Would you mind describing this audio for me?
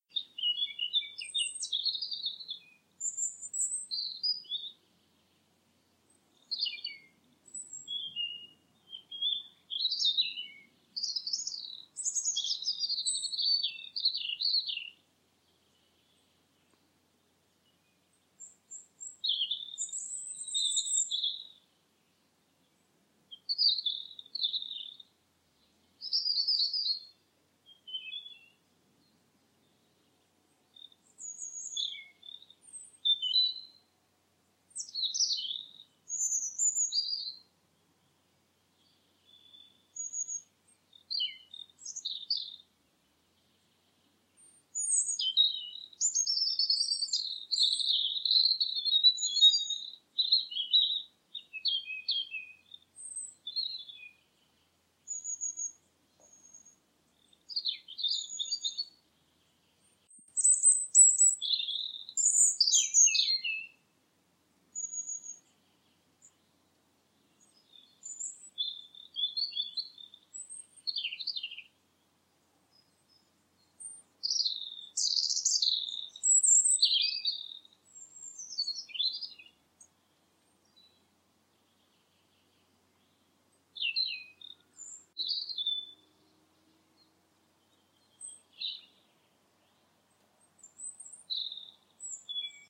filtered sound bird 1
Edited from a sound that I uploaded recently. I removed the sound of something tapping the microphone. Sound of birds in a forest. recorded with a phone and edited in audacity.